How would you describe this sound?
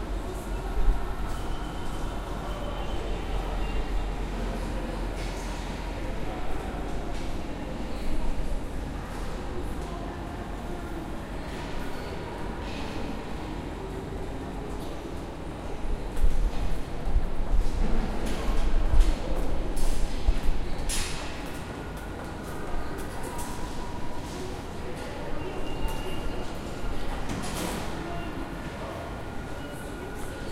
Recorded in Lisbon.
city, metrostation, noise